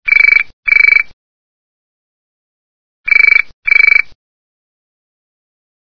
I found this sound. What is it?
BT Trimphone Warbler
bt, warbler